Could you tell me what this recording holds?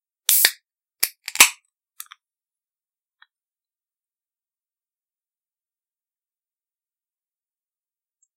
open-can (clean)
open, opening, coke, can, drink, soda